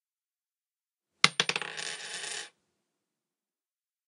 quarter falling
Quarter dropped onto a wooden table top.
change, drop-change, drop-money, drop-quarter, money, quarter, quarter-drop, quarter-dropping, quarter-falling